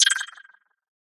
UI Synth 00
An synthesized user interface sound effect to be used in sci-fi games, or similar futuristic sounding games. Useful for all kind of menus when having the cursor moving though, or clicking on, the different options.